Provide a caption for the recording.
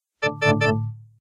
Critical Stop2
Negative computer response indicating an action could not be carried out.
alert, computer, interface, programming